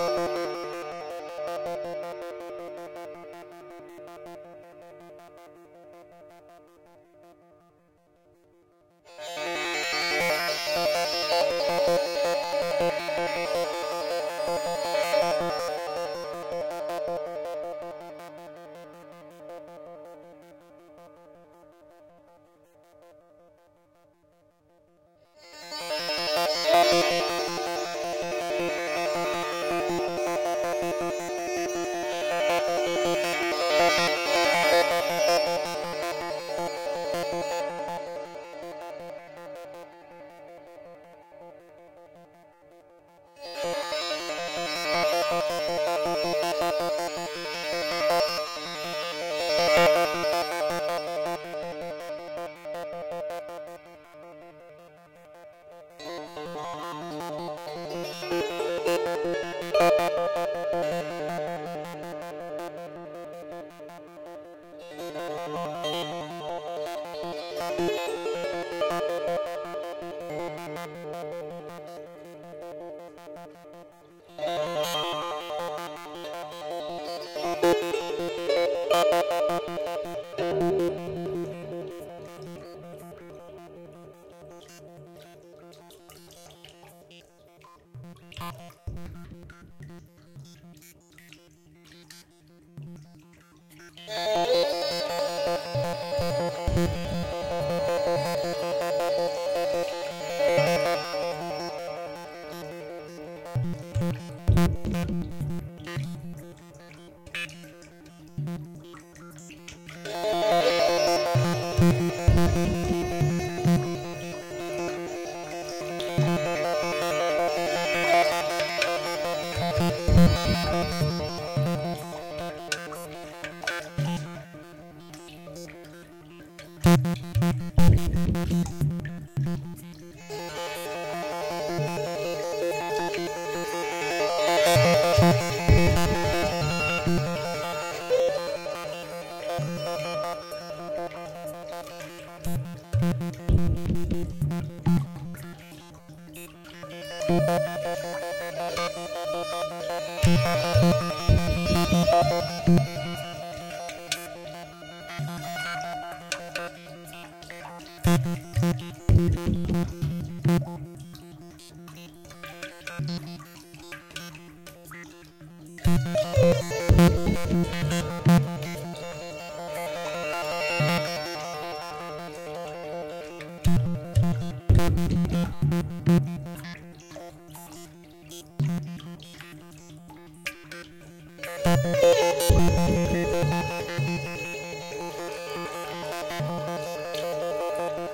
Digital error in music transmission
Digital clock error through S/PDIF port of M-Audio 1814 sound card. Very common.
Error, Failure, Digital, Fail, Interruption, Bug, Loss, SPDIF